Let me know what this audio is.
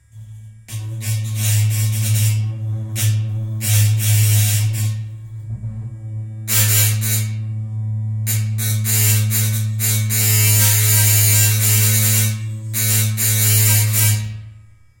loud electric1 - loud electric1
Electric shaver, metal bar, bass string and metal tank.
shaver, processing, metallic, motor, metal, Repeating, electric, tank, engine